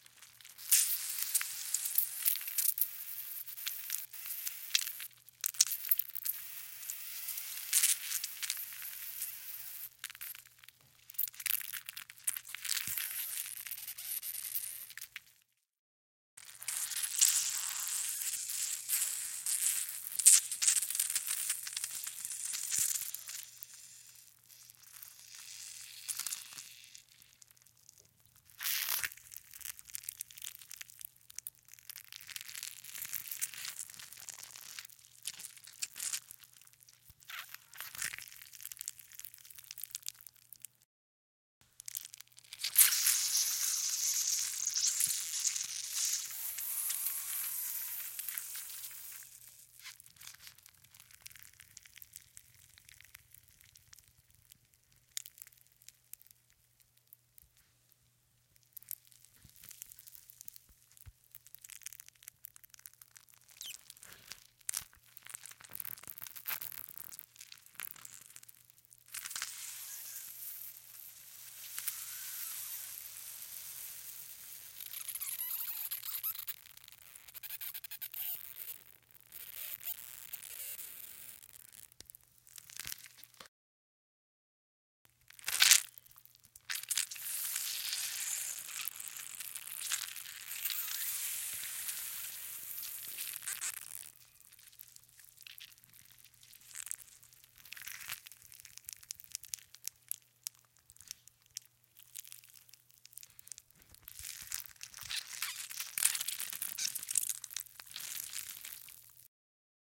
grapefruit squish
Squishing half a grapefruit in a bunch of different ways to make some gross and strange sounds. Recorded with AT4021 mic an Modified Marantz PMD661. There was some noise from our neighbor's heat so I EQ'd that out as much as possible.